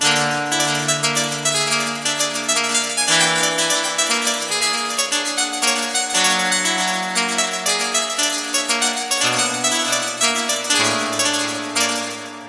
I created this perfect loop using nothing but Audacity.